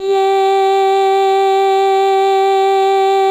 vocal formants pitched under Simplesong a macintosh software and using the princess voice